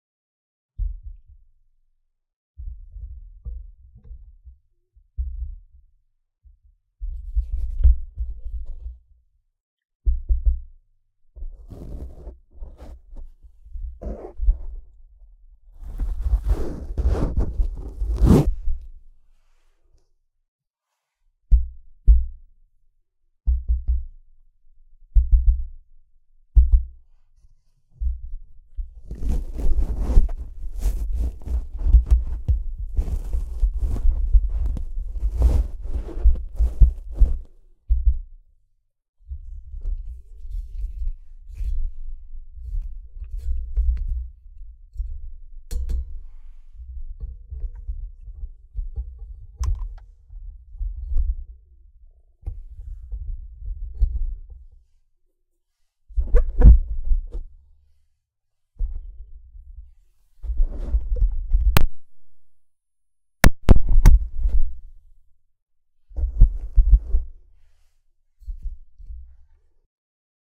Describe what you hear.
Microphone foley - bumps, thumbs, touching cables, unplugging XLR, windscreen
Foley sounds. Neumann TLM 103 microphone being adjusted, its cables bumped, tapping on the windscreen, removing the windscreen, tapping on the microphone, tapping on the pop filter, putting windscreen on, turning microphone, the XLR cable being unplugged and then plugged back in.
Good sounds for stand up comedy, concert scenes, karaoke, general microphone maintenance.